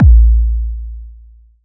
KICK PACK 0303
KICK DRUM BD